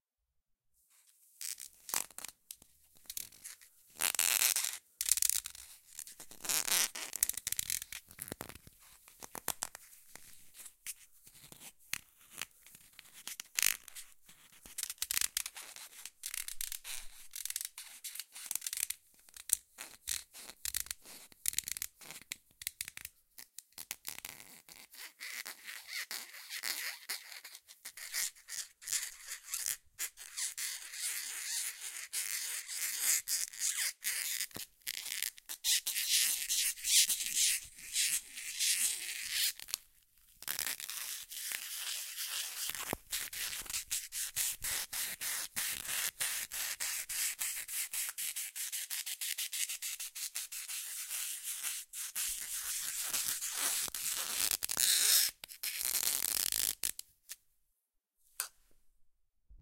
Handling Polystyrene
multi, sample, polystyrene, beyer, ASMR, technica, 13x3, drum, microphone, breckner, scrape, josephson, snare, electrovoice, drums, shure, percussive, sandyrb